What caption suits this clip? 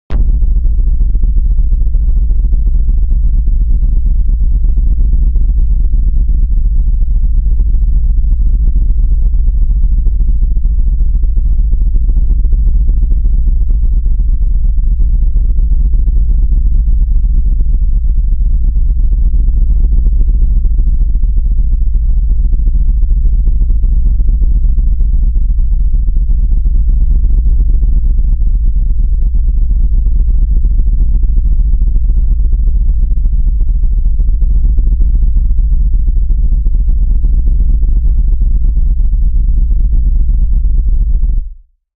LOW DRONE 007
One of a series I recorded for use in videao soundtacks.
ambience, background, drone